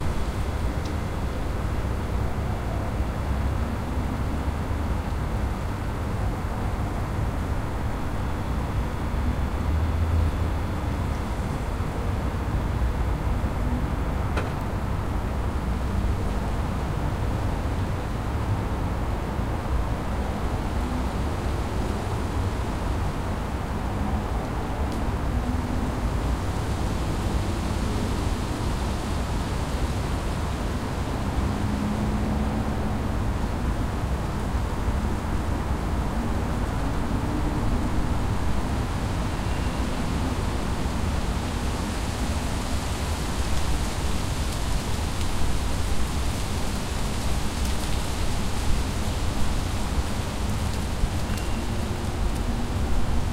Small yard evening atmosphere in the center of city. Rumble of city. Tall trees. Wind in the upper branches of tree. Noise of leaves. At the end of record some leaves have fallen.
Recorded 2012-09-28 09:15 pm.
XY-stereo, Tascam DR-40